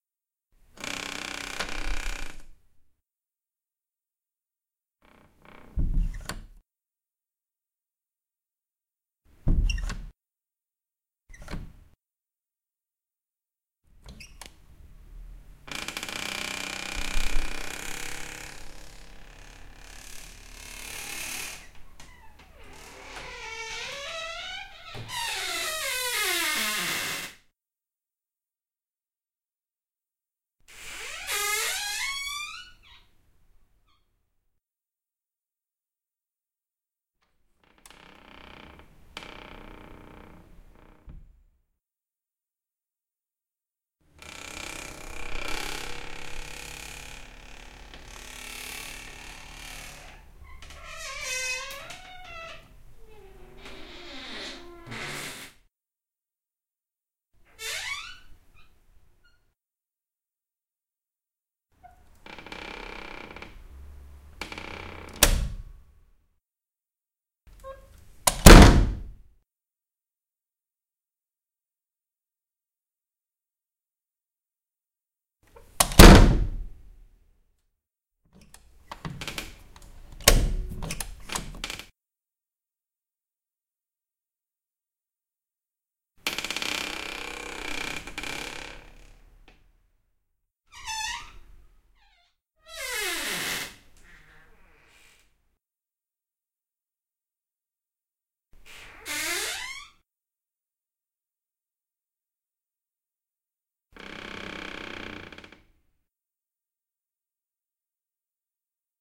Creaking Door.
If you enjoyed the sound, please STAR, COMMENT, SPREAD THE WORD!🗣 It really helps!